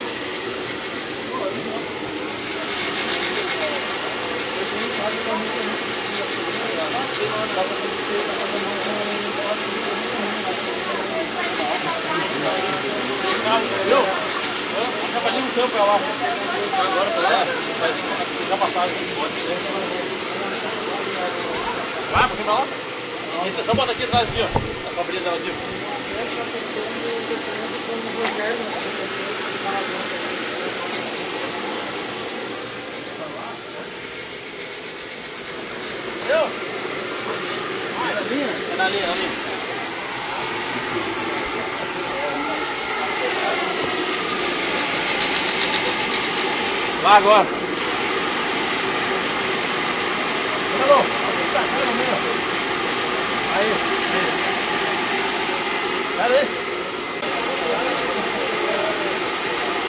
TRATADA190127 0775 tunel da ipiranga enchendo
Stadium Field Recording
Field, Recording, Stadium